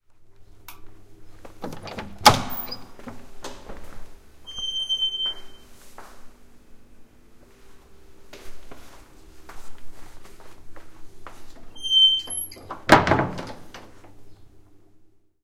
big wooden door open close
Large heavy wooden door between a vast room and outdoor opened, pass through, closed. foot steps and ... always turn off the light :)